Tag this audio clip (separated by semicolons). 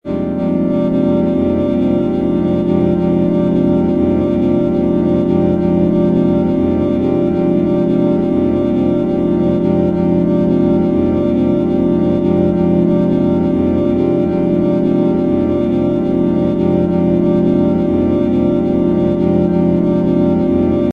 ambient; drone